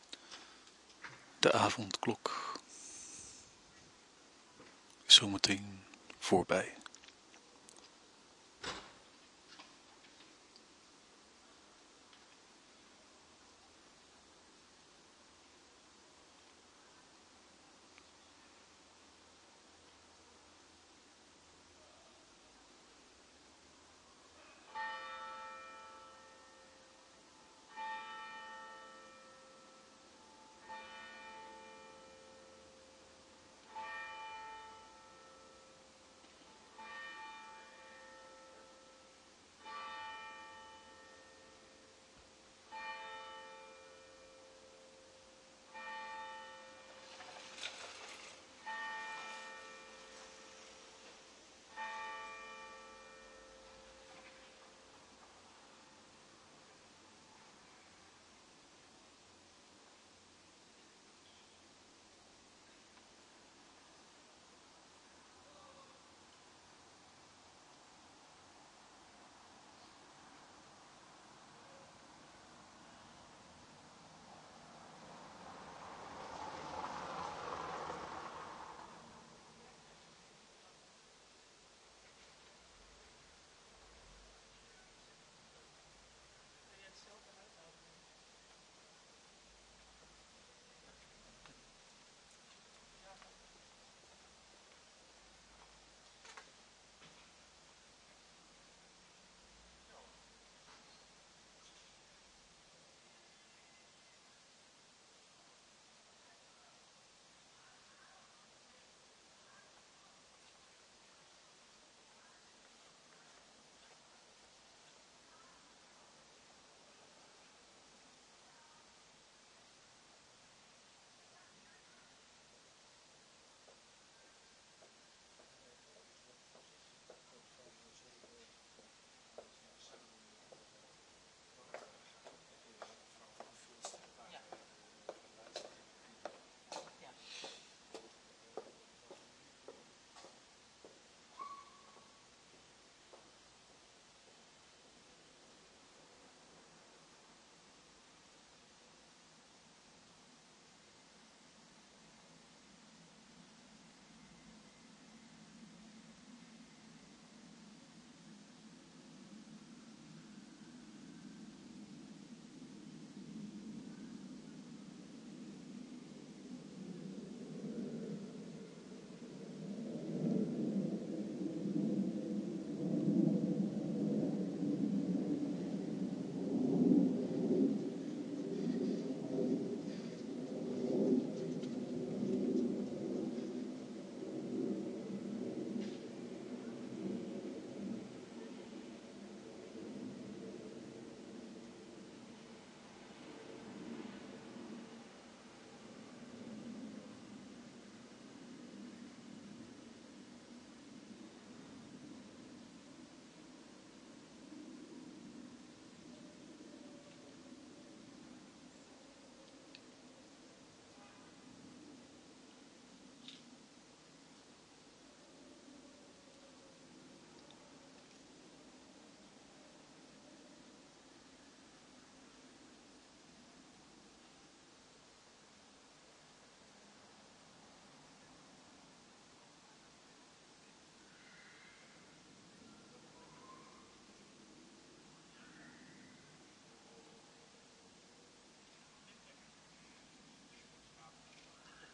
Einde avondklok210428 22h00
The end of the Corona curfew at 22h00, as recorded in the city of Groningen (Tuinbouwdwarsstraat/Klein Vaticaan) at the 28th of April 2021.
Het einde van de Corona-avondklok om 22:00, opgenomen in de stad Groningen (Tuinbouwdwarsstraat/Klein Vaticaan) op 28 april 2021.
church-bell, field-recording, tijd